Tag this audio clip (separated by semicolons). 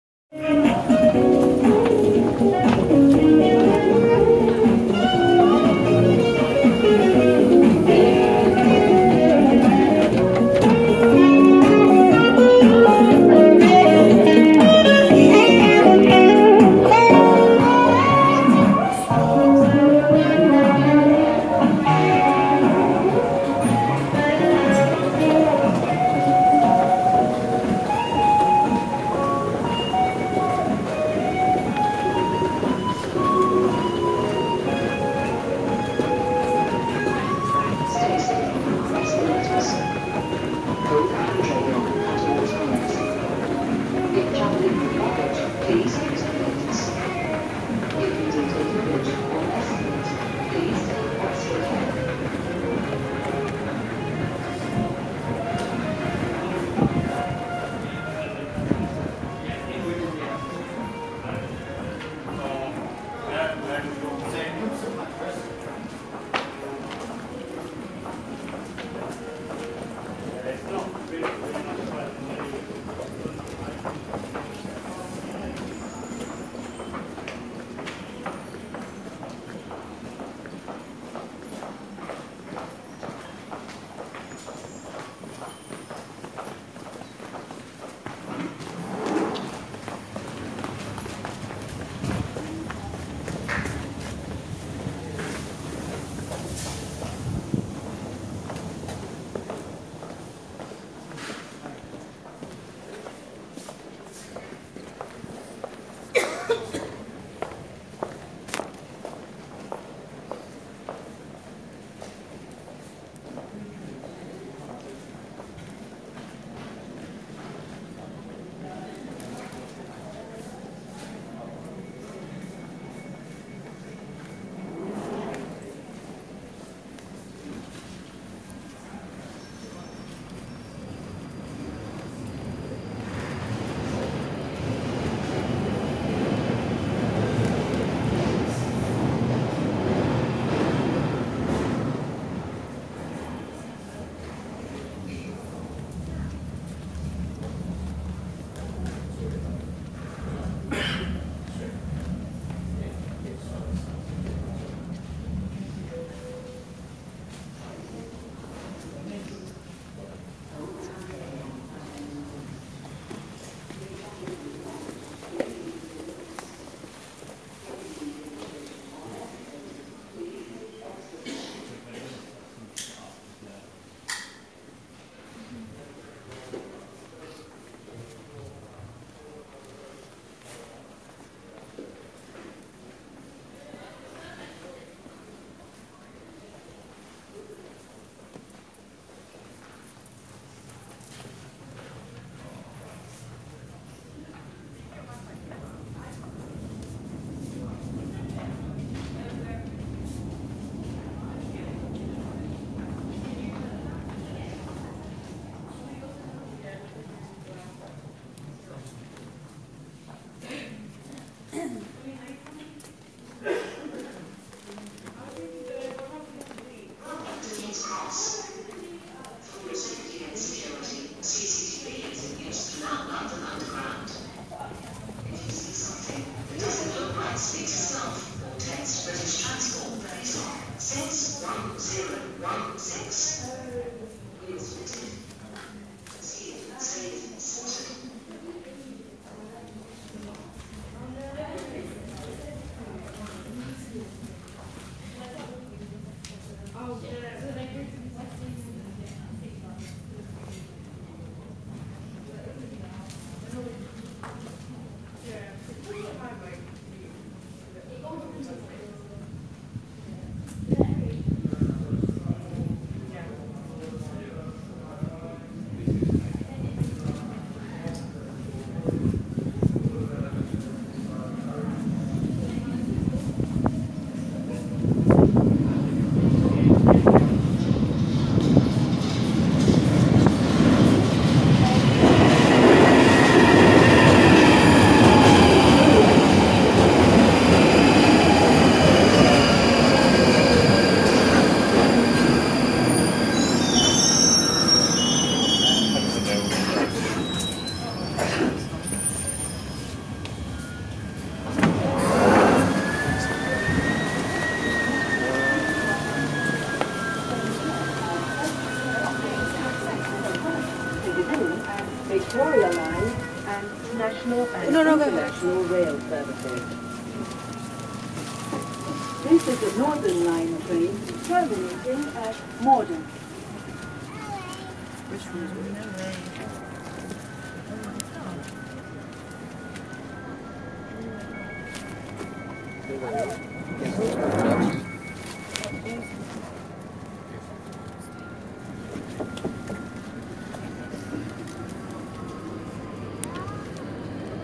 door; elevator; metro; station